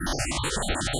Another batch of space sounds more suitable for building melodies, looping etc. See name for description.